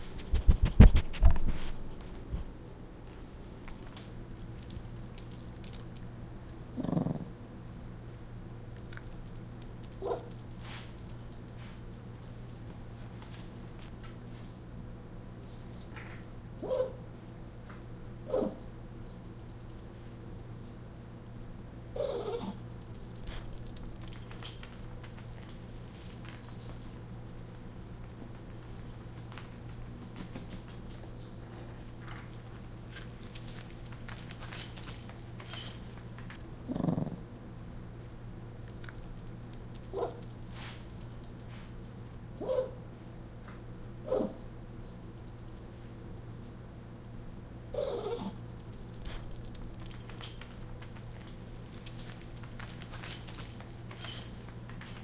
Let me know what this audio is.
I recorded my Toy Fox Terrier with a Zoom H2. The dog was very active and excited about eating, running around, sniffing and making other interesting dog sounds